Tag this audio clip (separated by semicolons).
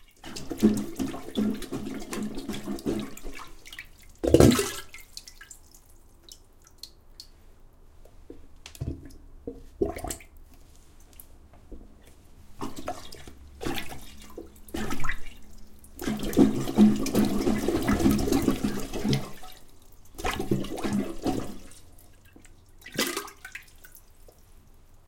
gurgle; foley; toilet; water; plunge; glub